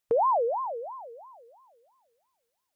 Synth Drop small
Small synth digital drop. Sounding like water drop.
effect, video-game, droplet, water, interface, synth, drop